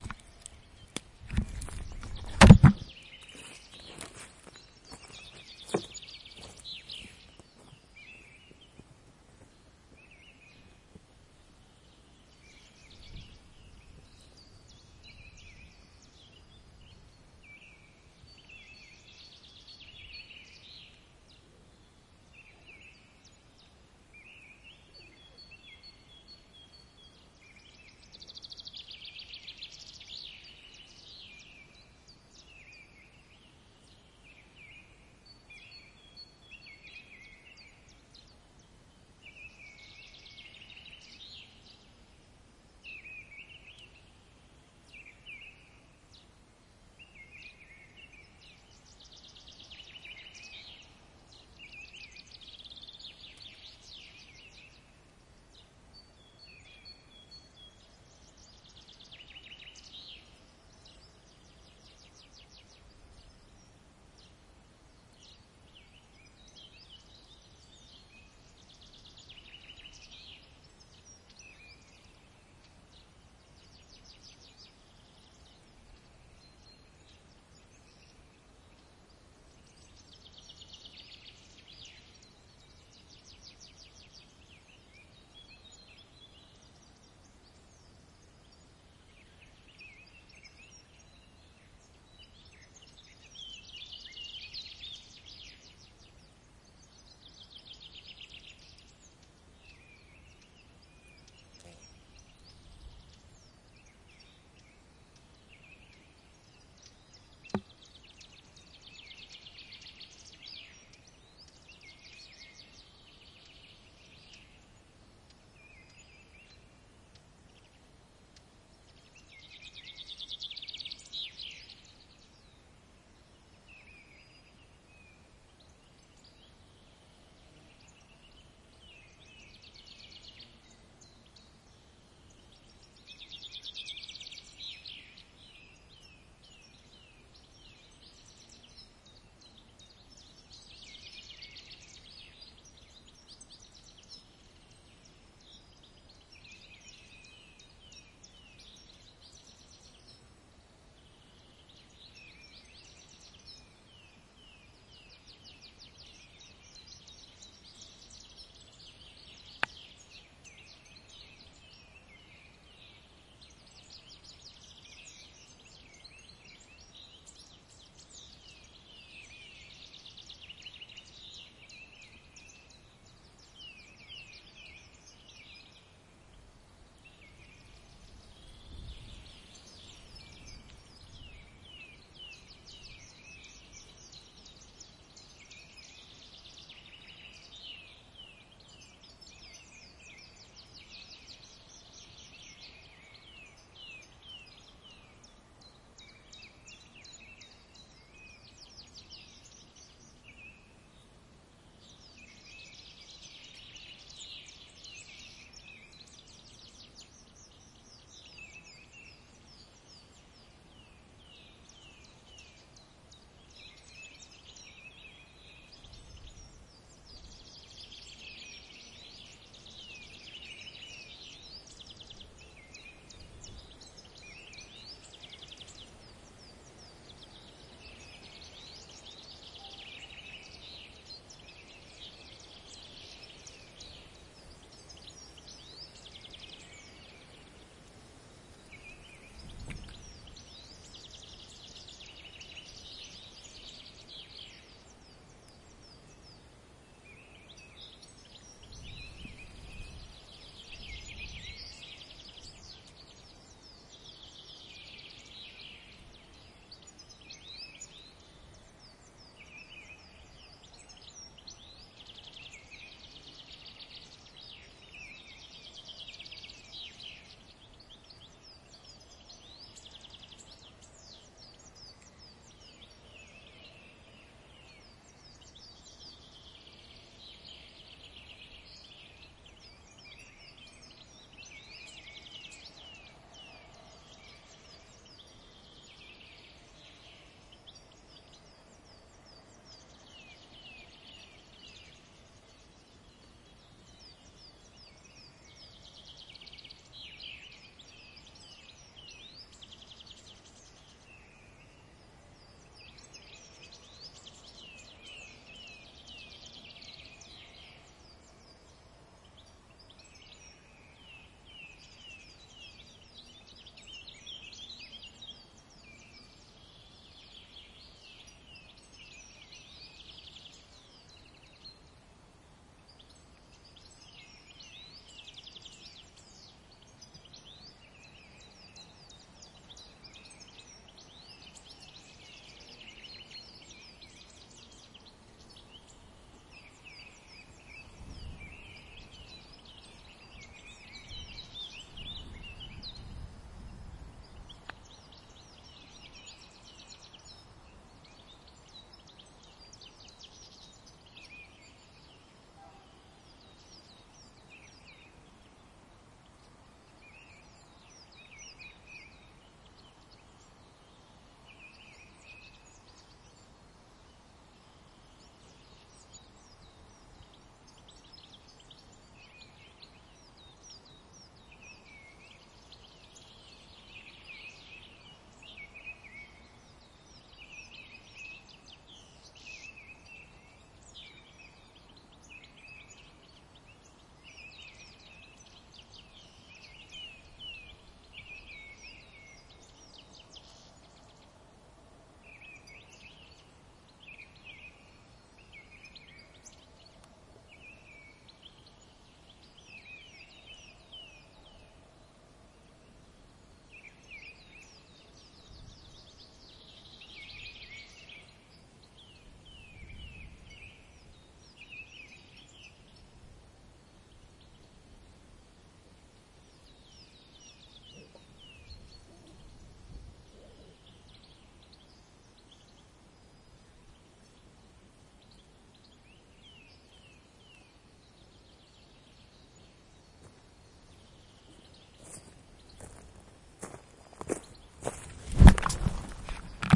spring in the woods - rear
spring in the woods